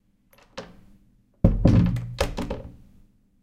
wooden door
a closing door
close, door, room, wooden